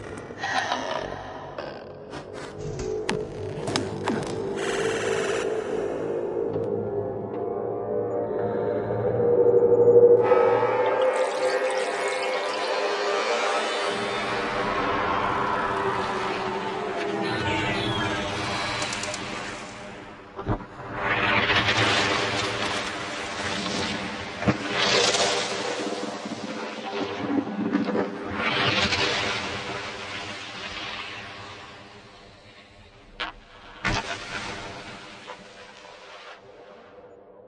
Long Atmos1 (Another Planet Brought To Life)
Little bit of work playing around with the GrainCloud...
granular, space